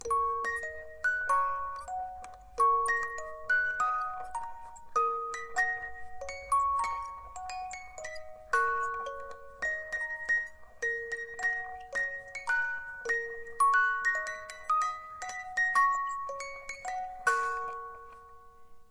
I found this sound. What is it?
One of those tiny instruments that use small metal rods to produce a song when you turn the handle. Recorded from really close by so you can hear the turning of the handle very well. This one plays jingle bells.
lullaby, musicbox, ping, pling, plong, small-instrument, turn